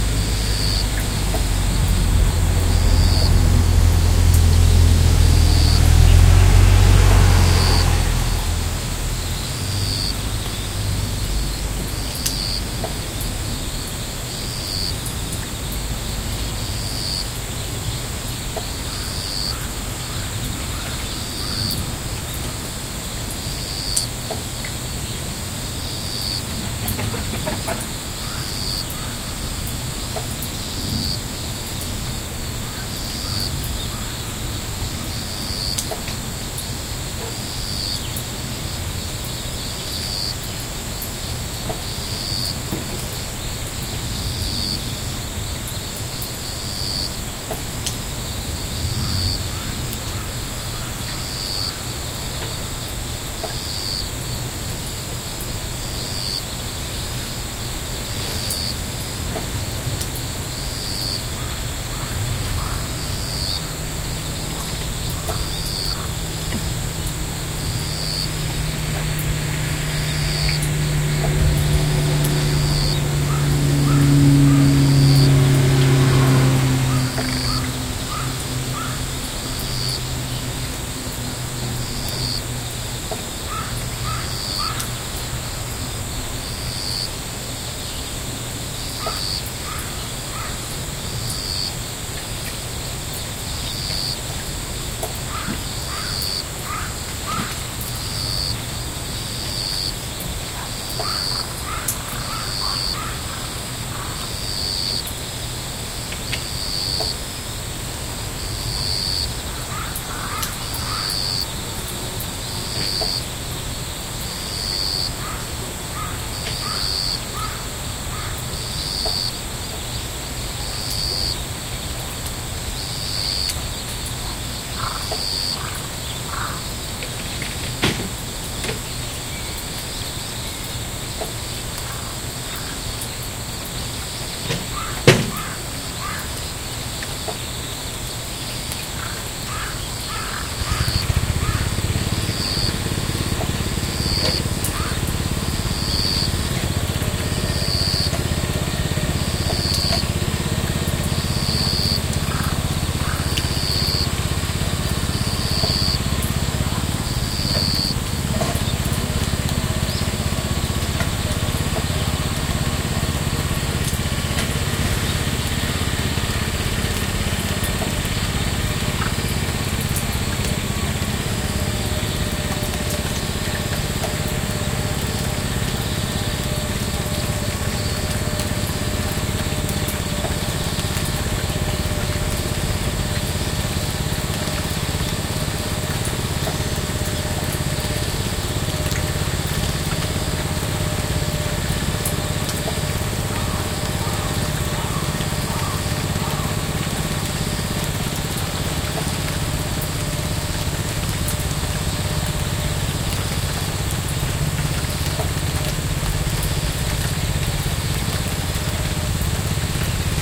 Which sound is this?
This is a stereo recording made from the window of a traditional Japanese farmhouse (called Village Ina), in Ina, Nagano, late september 2016. Some workers were plucking vegetables on this rainy day. (This is close to the Japanese Alps, an area that inspired Studio Ghibli's "My Neighbour Totoro")
Recorded with the Zoom H2n Handy recorder. You can hear:
- raindrops falling on the house, the roof, the land
- various birds (chirps and crow sounds)
- various crickets, cicadas... strong sounds
- cars passing by (around 1:10, the driver really needs to shift up lol)
- a tractor engine (around 2:20)
farmland, rural, farmhouse, nature, meadow-land, engine, animals, atmosphere, tractor, guesthouse, morning, rainy, japan, ambiance, calm, countryside, ambience, cicadas, farm, country, car-passing, birds, field-recording, rain, ambient, crickets, farmwork